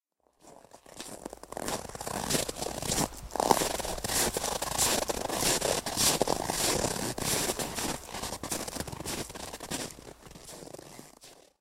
Walking on snow 3
walk, frost, foot, walking, winter, feet, ice, footsteps, snow, ground, steps, step, footstep, running, freeze